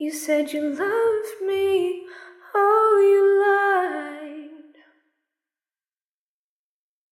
'You said you loved me, oh, you lied' Female Vocals (Cleaned with reverb by Erokia)
A female voice singing the lyrics'You said you loved me, oh, you lied'. Cleaned with reverb by Erokia!